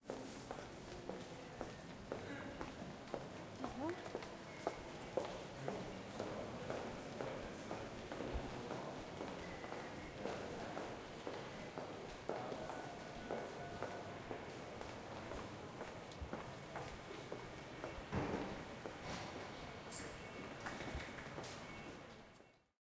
St Pancras station int walking heels atmos
Recording inside St Pancras' train station. A woman in high hells walks past me in a very reflective space.
Equipment used: Zoom H4 internal mic
Location: St Pancras
Date: July 2015
heels, station-atmos, St-Pancras, Train-Station, walking